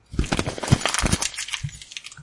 crumpling paper
The sound of paper crumpling
crinkling, crumple, crumpling, page, paper